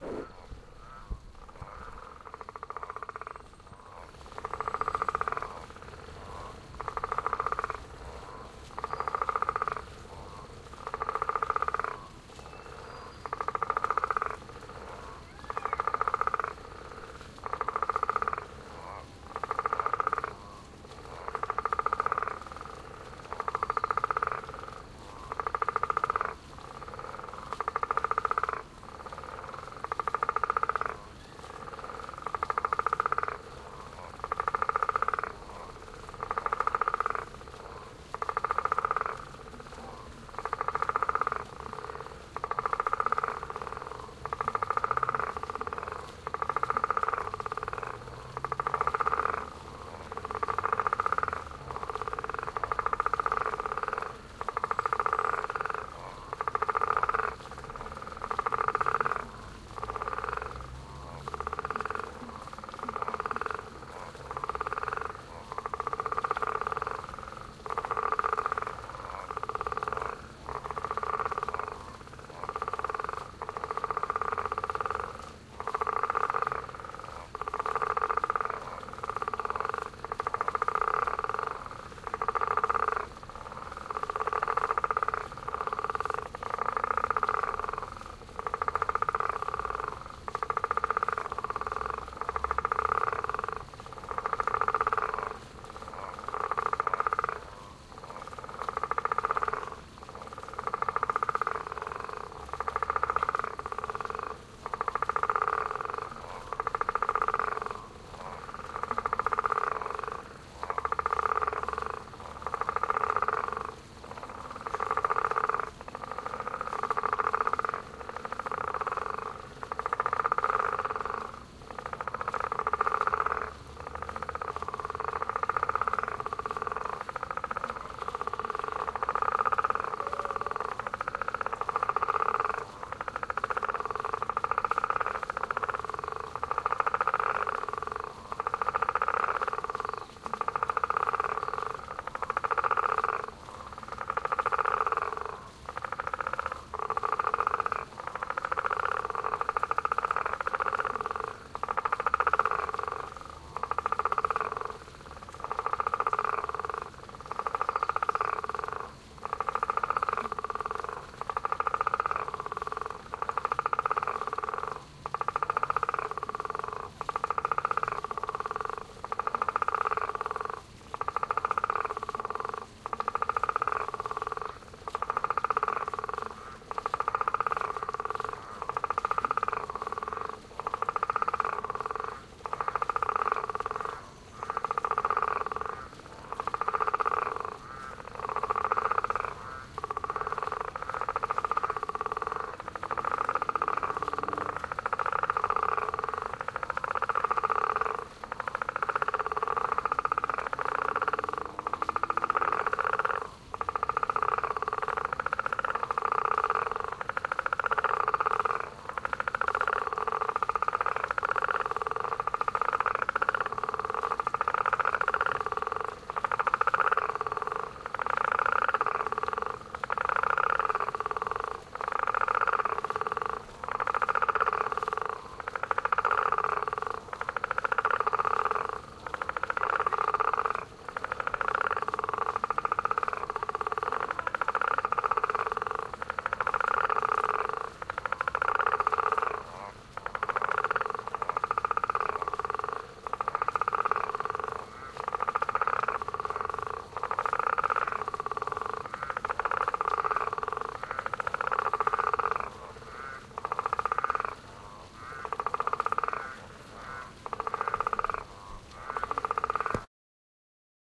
Night Time outside the students Residence at a Lake